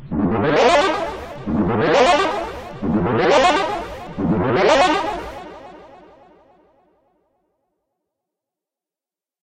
Funky Alarm 04
Funky Alarm
Created using Audacity